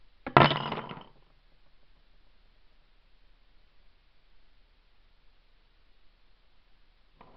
coin bottle pitch2
flipping a plastic bottle with coins inside (pitch manipulated)
MTC500-M002-s14, bottle, coin, manipulated, pitch